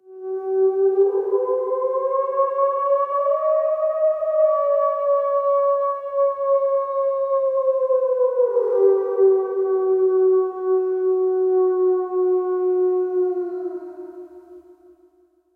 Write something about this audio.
howl processed sound
howl, reverberation, transformation